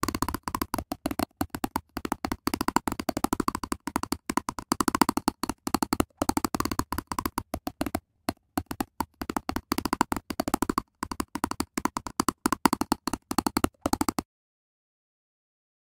Shivering Teeth Sound. Live and cleaned up to give a comic but real sound. Enjoy
shivering, Teeth, clacking, chattering